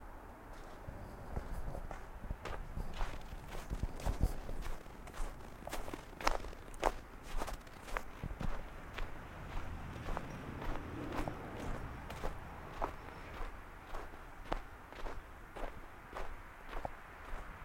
Walking at a moderate speed in the snow